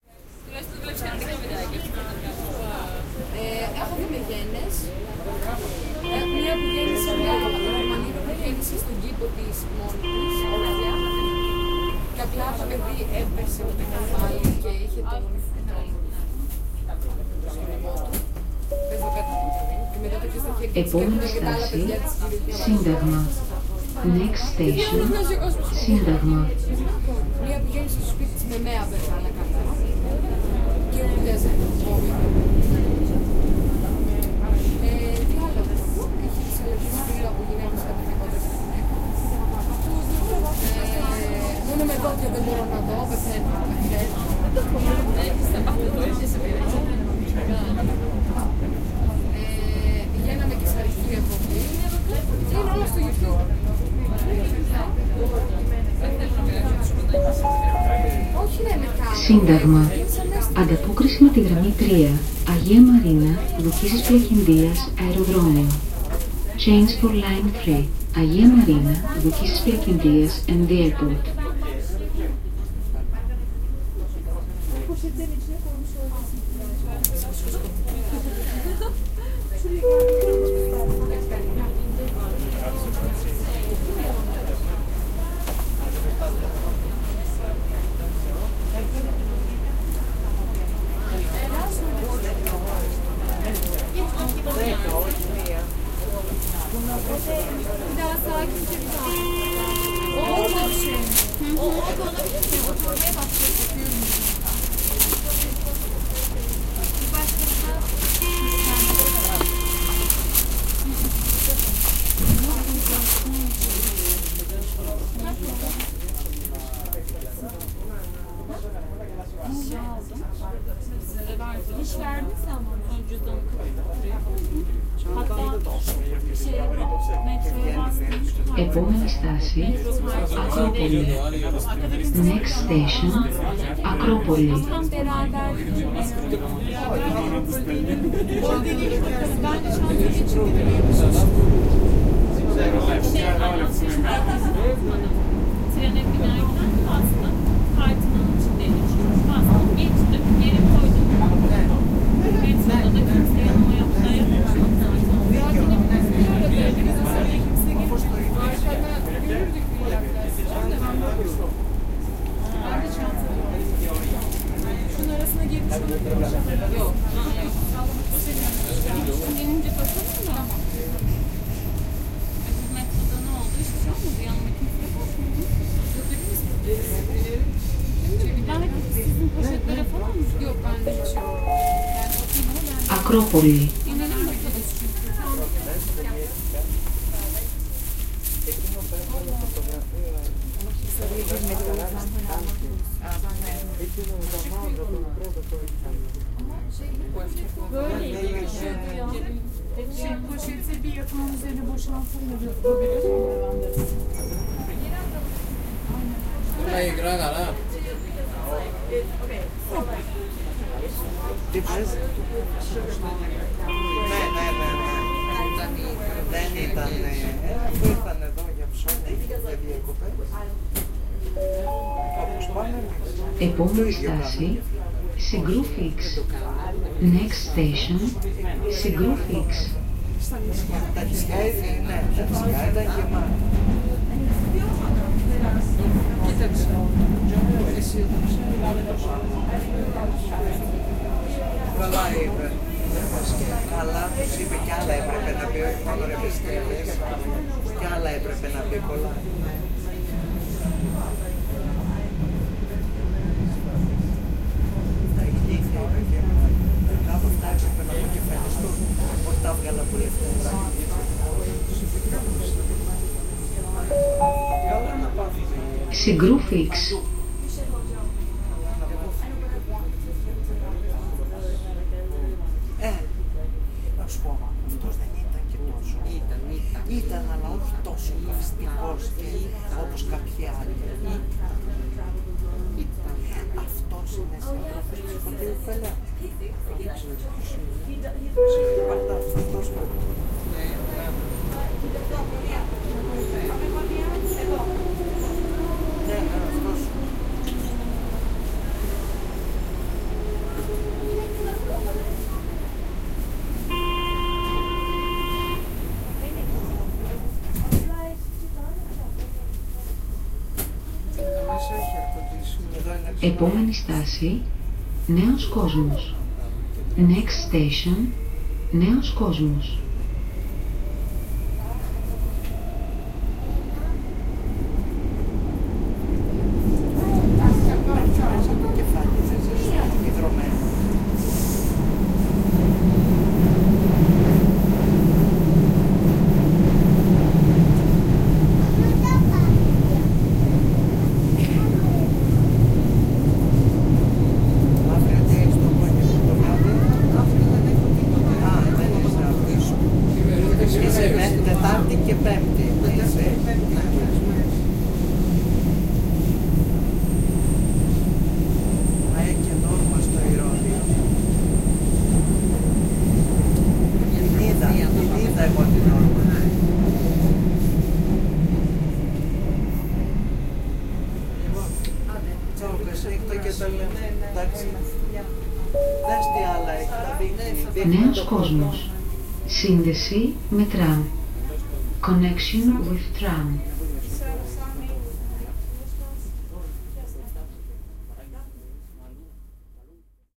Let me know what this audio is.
A short ride in the Athens underground which took place on the 15th of July 2019.
People talking can be heard, the different announcements for each station, the door opening and closing etc.